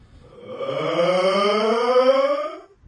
PAGET Ludovic 2014-2015 skype connection sound
This is a recorded sound of a yawn which I transformed into a sound similar to the Skype connection sound.
To do it, I used 3 effects. Firstly I reversing the direction, then I used the Paulstretch effect with a stretch factor of 2 and a resolution of 0.25 second. Finally, I increased the treble 3.9 decibels.